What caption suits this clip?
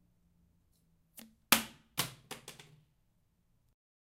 bottle fall Recording at home
plastic, bottle, water, iekdelta